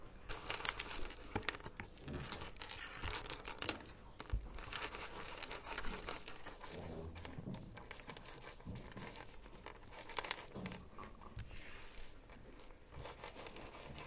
mySounds EBG Joao1
Sounds from objects that are beloved to the participant pupils at the Escola Basica of Gualtar, Portugal. The source of the sounds has to be guessed. Portugal. The source of the sounds has to be guessed.